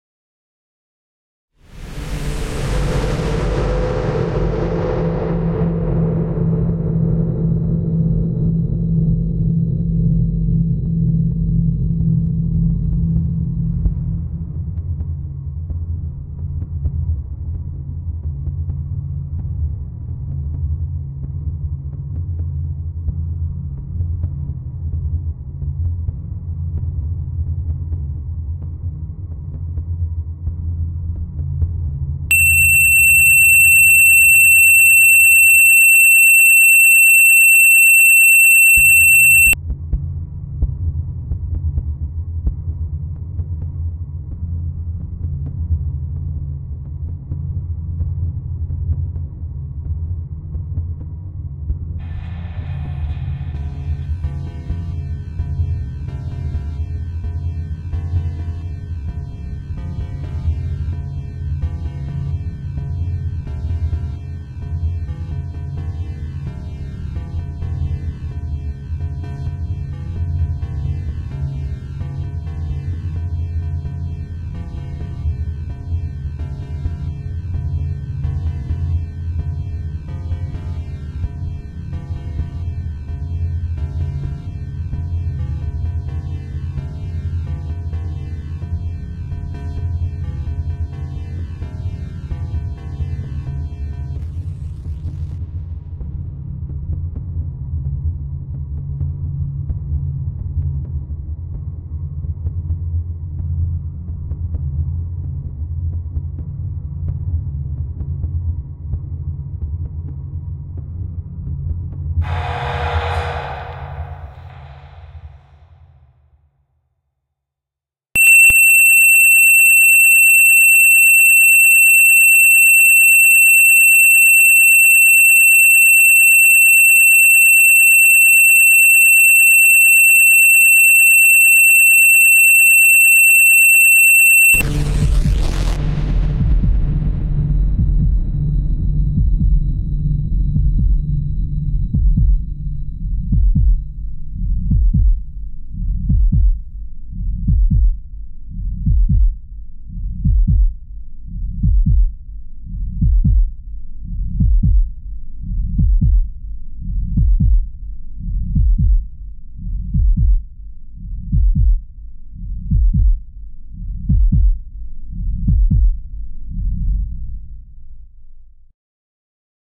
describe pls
the end of death
radio, star, future, SUN, sounds, wave, space